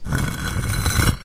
Heavy cinder block sliding across asphalt.